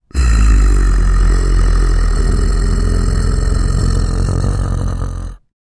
Recording myself attempting to do a very deep and slow growl.